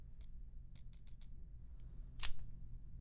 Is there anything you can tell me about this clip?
2. Bombillo apagandose Light bulb turning off
Light Bulb failing and turning of, made with a pen and my nail
Bulb, Light, Off